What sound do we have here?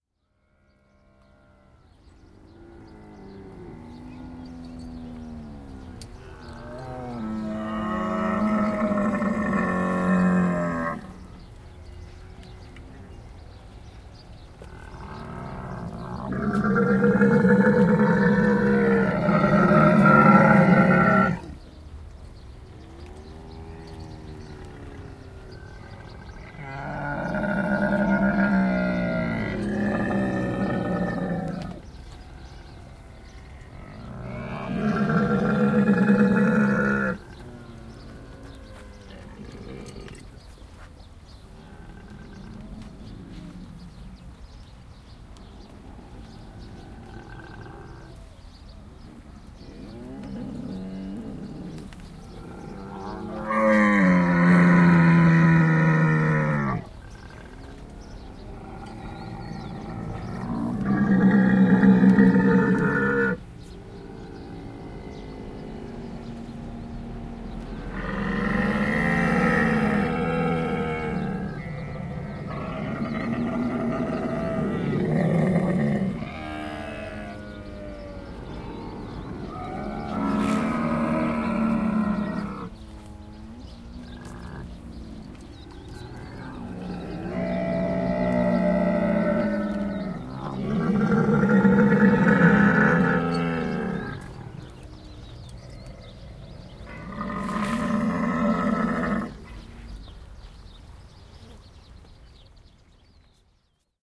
Camel Farm Bahrain (February 27th 2016) - 1 of 2
Short recording of a large group of male camels calling to a pack/herd of females in an opposite enclosure. Recorded at the Janabiya Royal Camel farm in Bahrain.
camel, field-recording, growl, nature